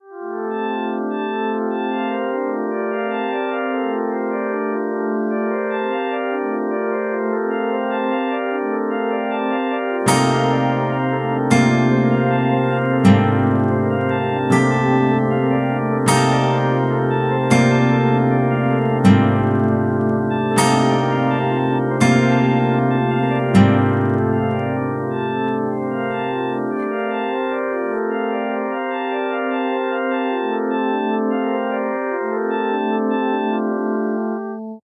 SB tones+guitar v2
Sound sketch using Markov process to generate a minimal sound scape using 10 sine wave tones at frequencies from the c-minor scale. Sine wave attacks are smooth here, sounding almost like an undulating electronic ogran. Guitar chords are related to c-minor scale and are also chosen using the same Markov process.